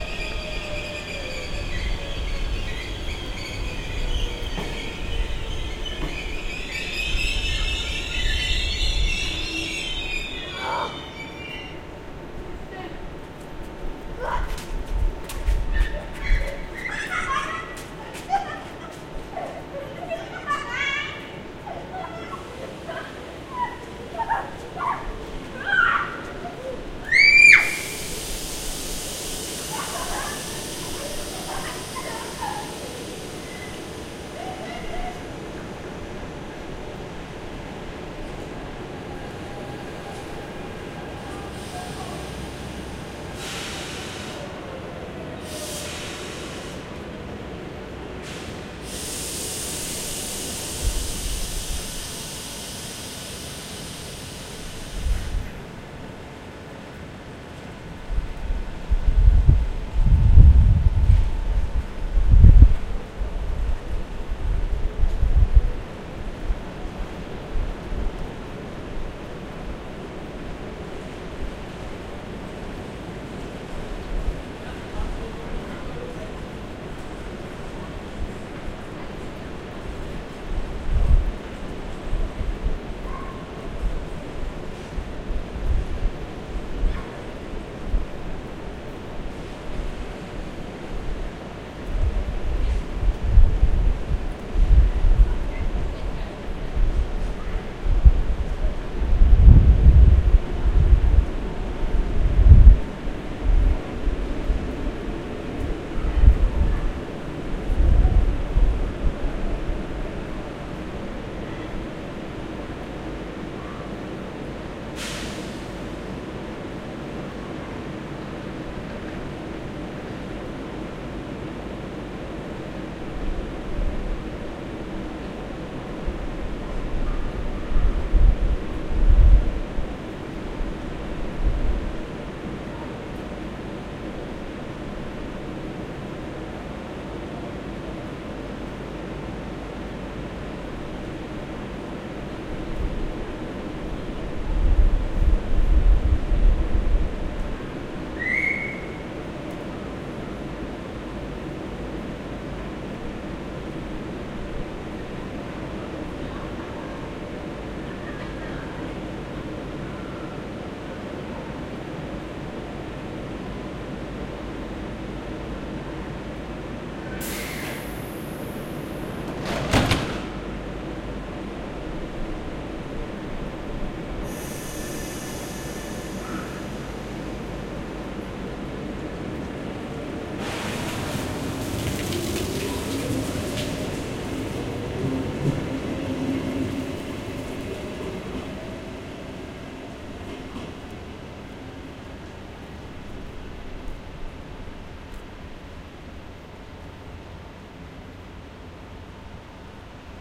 Field recording from Oslo Central Train station 22nd June 2008. Using Zoom H4 recorder with medium gain. Recording starts with the arrival of an Airport Express Train and end with the departure of a local train.
train-station, norwegian, norway, oslo, atmosphere